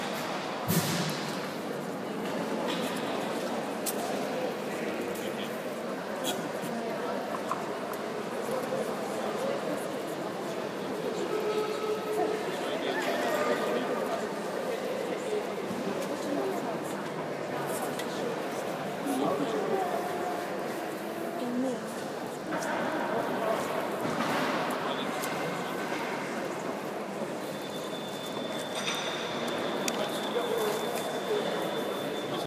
Public Space big
recorded in a large inside space with lots of echoing chatter
open, talk, ambience, chatter, people, caf, field-recording, chatting, food, talking, ambiance, hospital, crowd, soundscape, general-noise, space